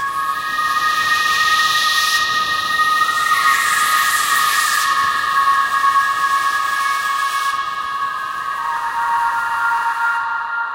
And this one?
Ooh Fantasy deep Vocal Fantasy Ambient SFX 20200929
Ooh Fantasy deep Vocal Fantasy Ambient SFX
SFX conversion Edited: Adobe + FXs + Mastered
psychedelic, design, Ooh, vibrating, soundscapes, dreamlike, reverb, deep, Ambience, Vocal, SFX, dream, Ambient, preamp, Strange, unearthly, Fantasy, bizarre, cool, enormous, Horror